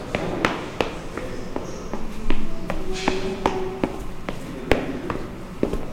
Field-recording of someone walking inside of a building.